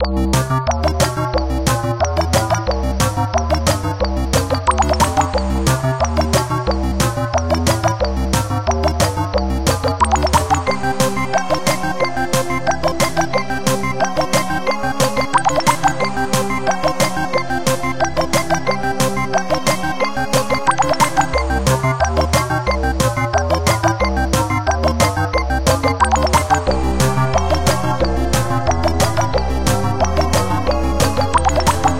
8 - l'antre du diable
64-bit old-school boss fight in Devil's den. Long loop with 4 parts (easy to cut), composed with different synths, stupid loop for punk childs.
hardtek, loop, 64bit, chiptune, stupid